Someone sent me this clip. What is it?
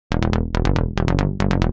BS-psy bass E minnor
triplet, dance, psytrance, trance, bass